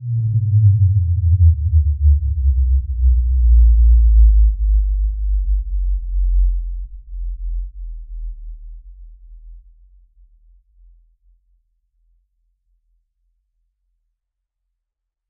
Are there a million of these sounds in film trailers? Not sure. Started with 100k tone - pitched it down over time - it was too low, so then I pitched the whole file up a bit.
Insert to make any slow mo sweeping matrix style scene look even more awesome... or not.
sinewave, low-frequency, sine, drop, electronic, trailer-sound, deep, tone, low, bass